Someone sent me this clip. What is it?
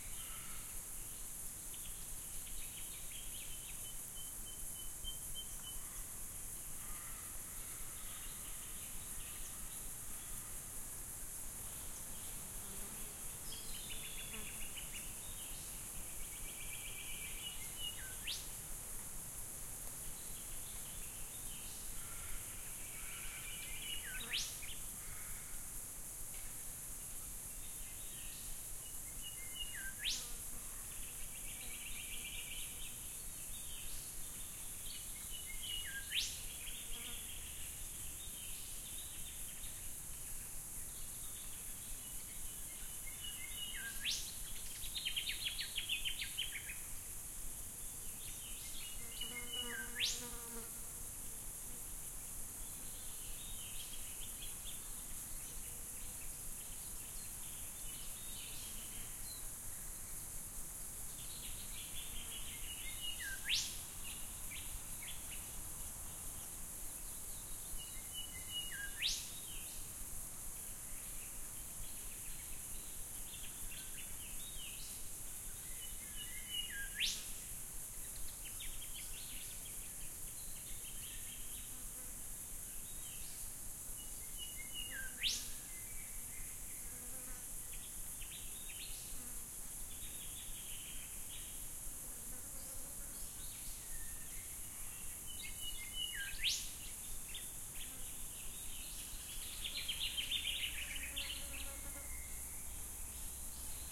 barham rainforest atmos
atmos from Barham River rainforest, Otway Ranges, Victoria, Australia. CU insects past mic.